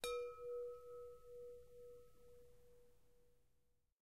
wind chimes - single 04
A single wind chime tube hit.
tuned, wind-chime, metal, chime, windy, wind, metallic, chimes, hit, wind-chimes, tone, windchime, windchimes